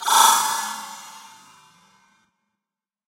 Alien Effect
Umbrella sound reversed, sped up and then slowed down again.